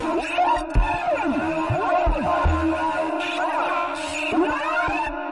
Effected vocal
More vocal improvisation and effected using vst's